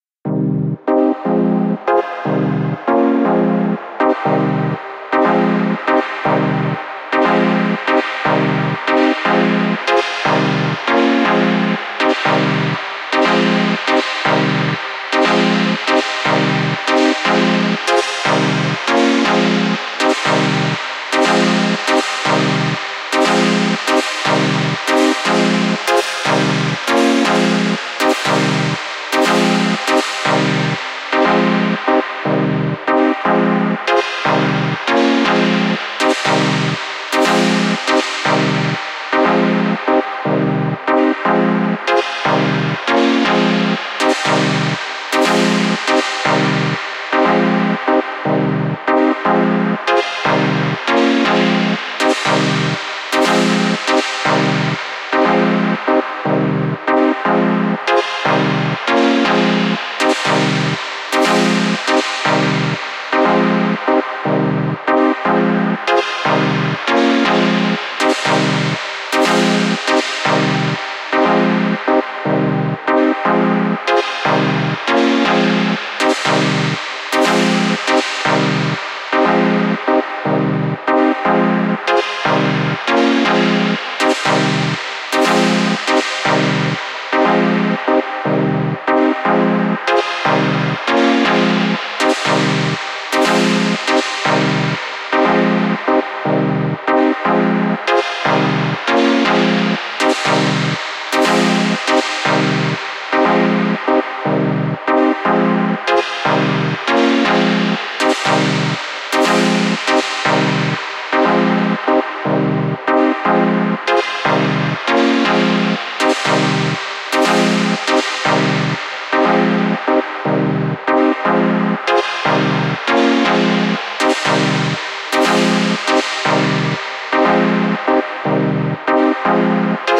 Some basic synth with filter and effect
Acid, Psychedelic, Experimental, Mental, TB-03